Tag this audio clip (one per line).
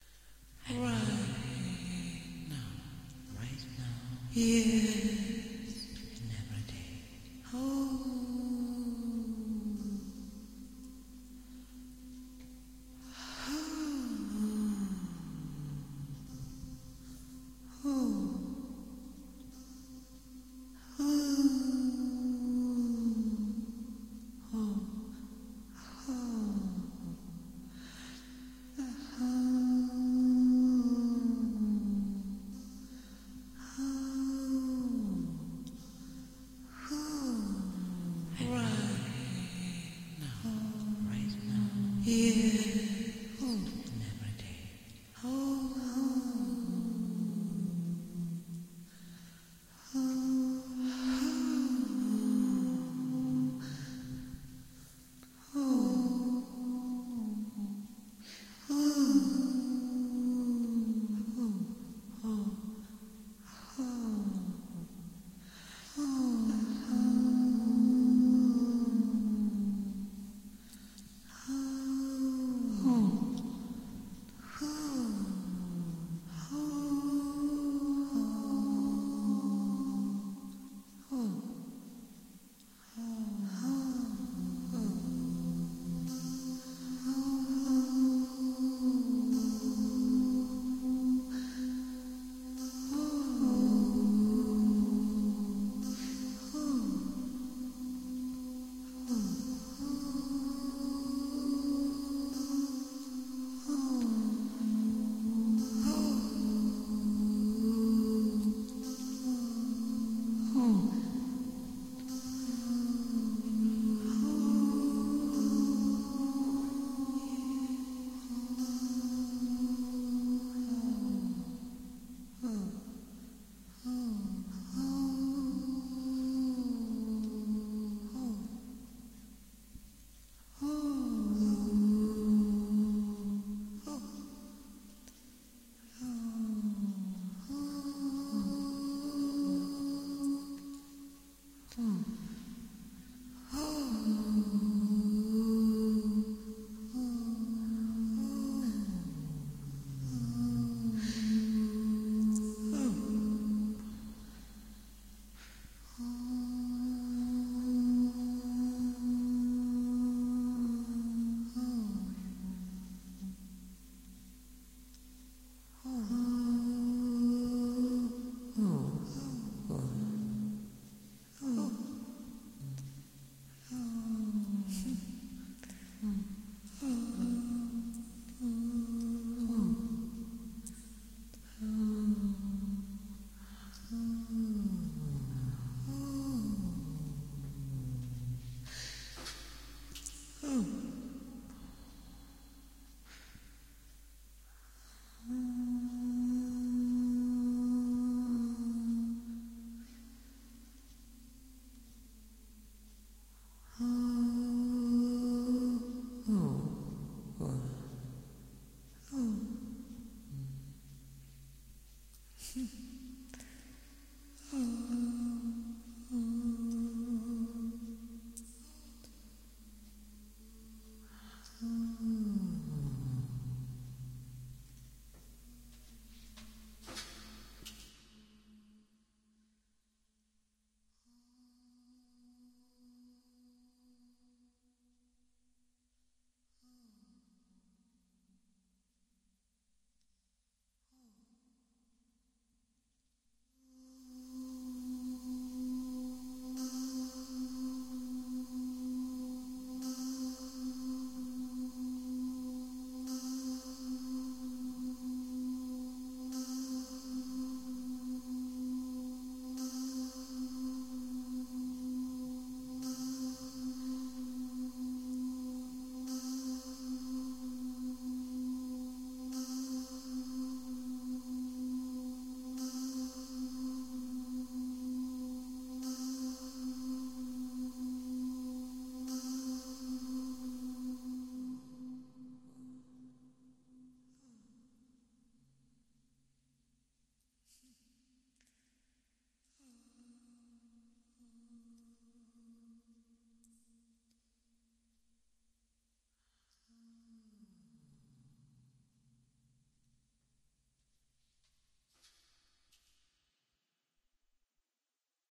Sound-Effect
Soundscape
Still